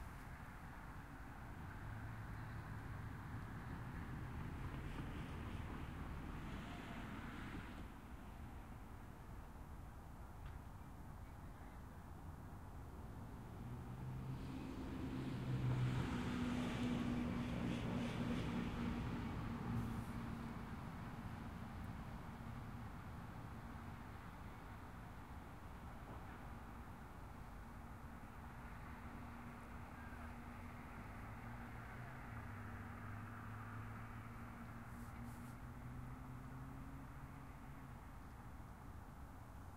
SUBURB AMBIENCE OUTSIDE 02
A quiet suburb outside in the fall recorded with a Tascam DR-40